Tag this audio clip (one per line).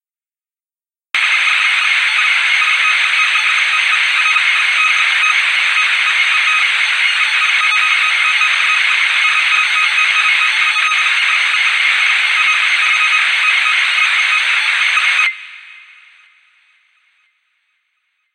futuristic
white-noise